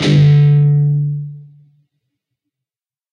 Dist Chr A&D 5th fret up pm
A (5th) string 5th fret, and the D (4th) string 5th fret. Up strum. Palm muted.
chords, distorted, distorted-guitar, distortion, guitar, guitar-chords, rhythm, rhythm-guitar